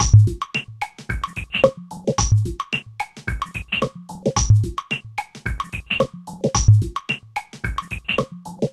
Squirly Perc 110
gloopy blippy bloopy percussion loop. Number at end indicates tempo
percussion, loop, electronic, drumloop